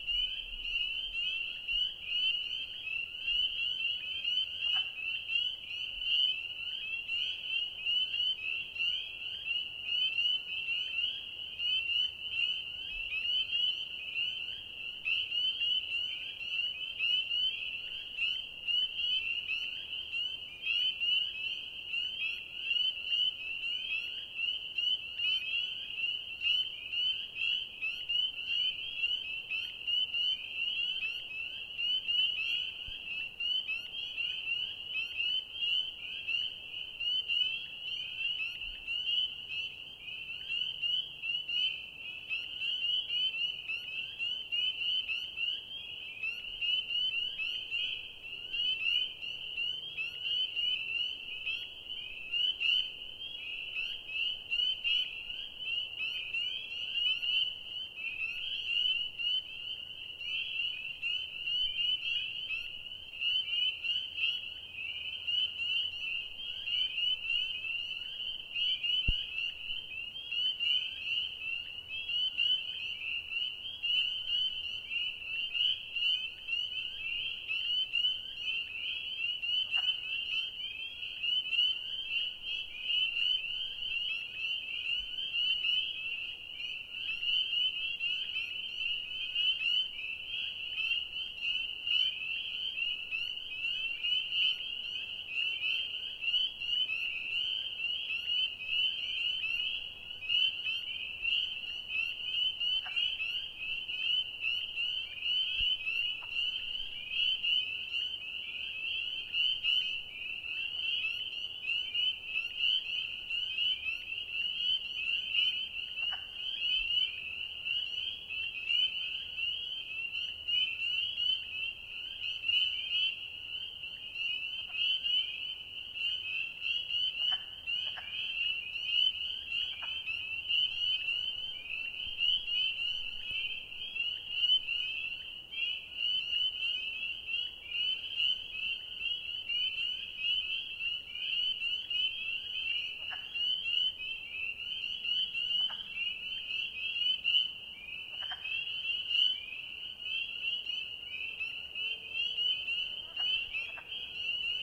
Spring Peepers | Clean Recording | South Berwick, Maine 2013
This is a very clean recording of spring peepers in a vernal pool in South Berwick, Maine.
By "clean" I mean there are no distracting near-field sounds and no distracting traffic or aircraft noises.
Also, the peepers are not too far away, so not too much echo/reverb effect.
There are a few clucks from wood frogs mixed in.
There was distant traffic during recording, but I used a super-directional mic to minimize this. If you find it detectable, then you could roll off the lows with a hi-pass filter.